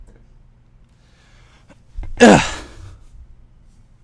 Shove Gasp
Male voice shoving or exerting himself
breath, exhale, gasp, shock